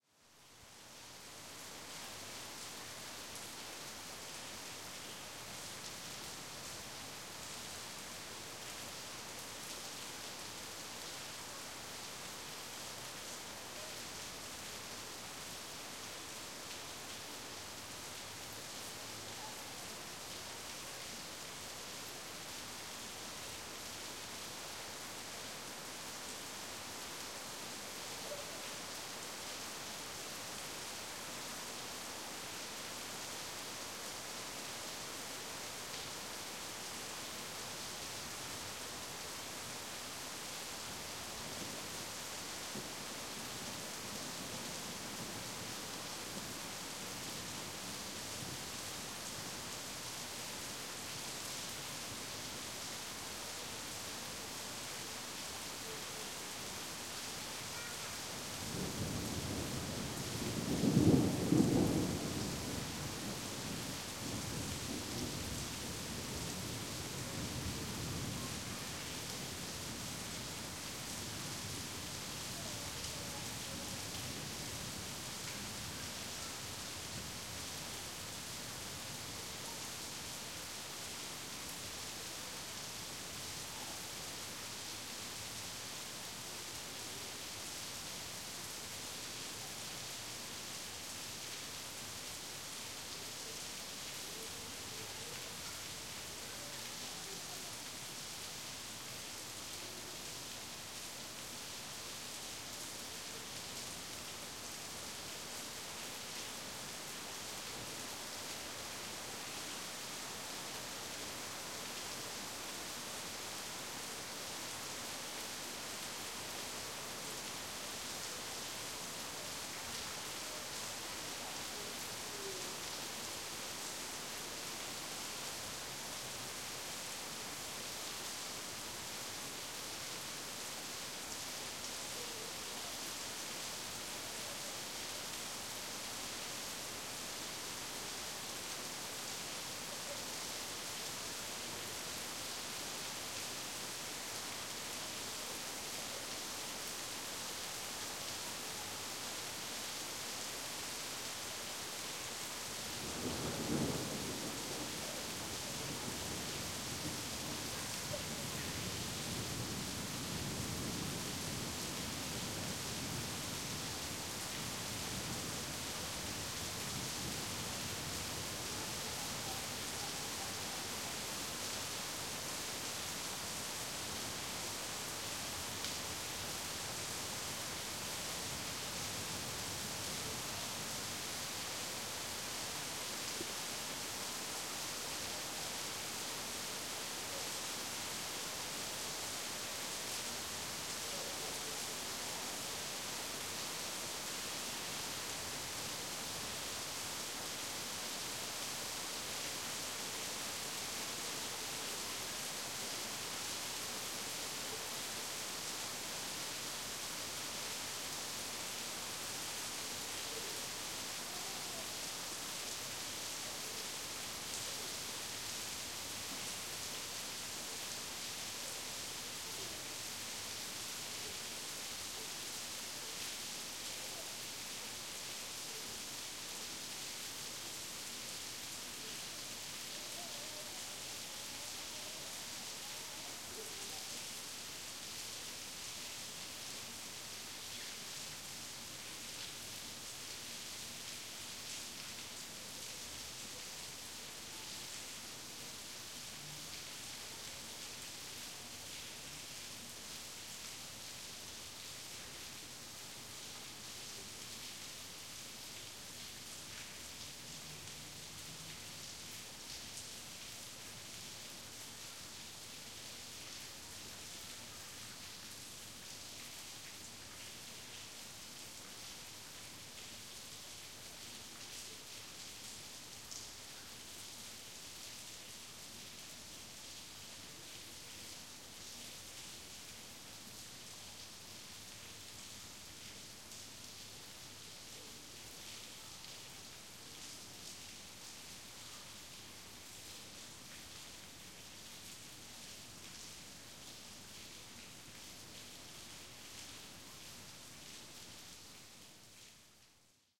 A heavy summer shower recorded from my window with a Zoom H2. There are some background noise (child's voice, but I think no car, can't swear to it though) and two claps of far away thunder. The rain becomes lighter in the last 1'30.
I'd be happy to know if you used it :-)
city, rain, summer, thunder